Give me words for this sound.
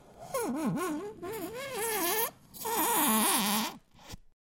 Rubbing and touching and manipulating some styrofoam in various ways. Recorded with an AT4021 mic into a modified Marantz PMD 661.